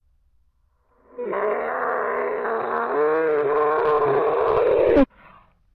I recorded some vocals for a song but later reversed them.